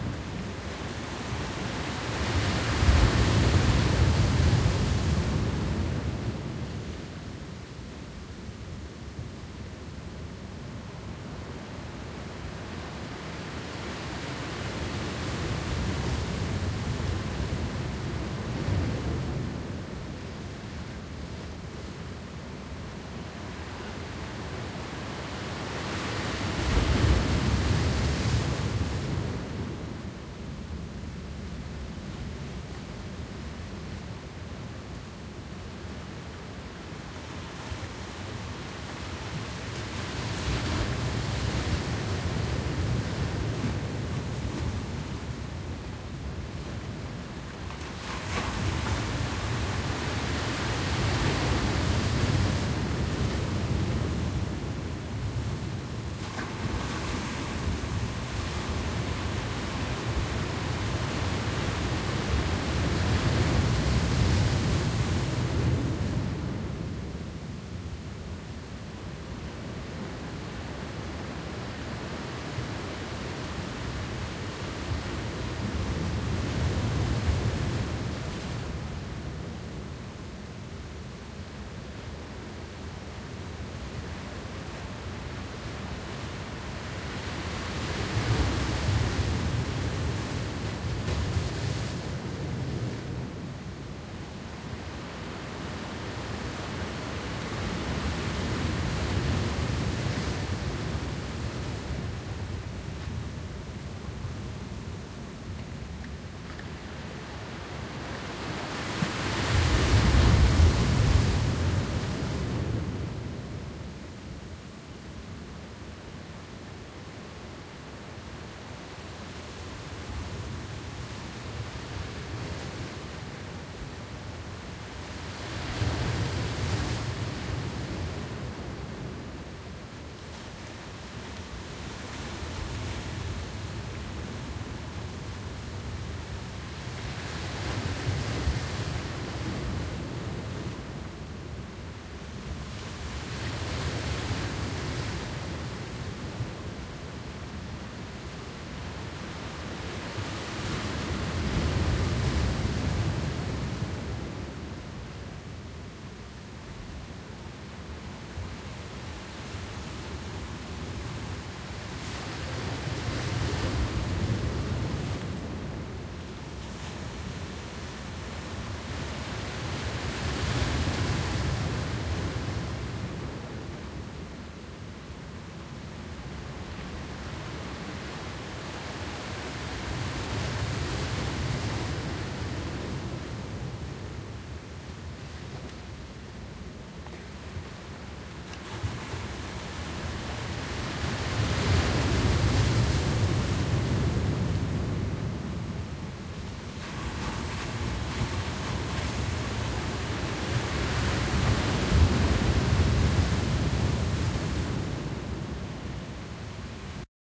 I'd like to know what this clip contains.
Waves crashing on a rocky shore - Reunion Island
Big waves crashing on the rocky shore of the Anse Des Cascades (Reunion Island, near Sainte-Rose), wind howling.
Recorded with : Zoom H1 stereo mic (1st gen)
ambient, field-recording, island, ocean, sea, shore, tropical, water, wave, wind